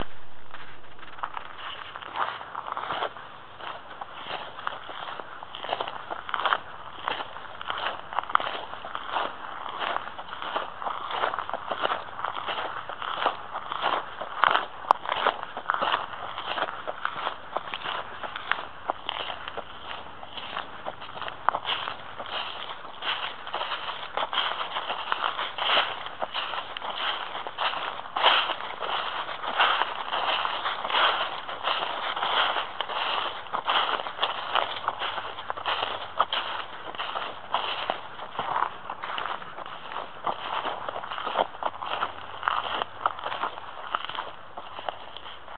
hiking gravel and dried leaves
Footstep sound on gravel road with dried leaves.